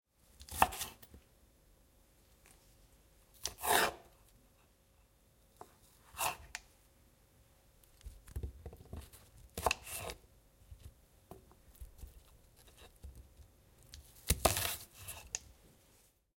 VEGETABLES CUTTING - 3
Sound of someone who is cutting vegetables (chicory). Sound recorded with a ZOOM H4N Pro.
Son de quelqu’un qui coupe des légumes (endive). Son enregistré avec un ZOOM H4N Pro.
cut, knife, slice, couteau, eat, cook, legumes, kitchen, legume, nourriture, vegetable, cooking, food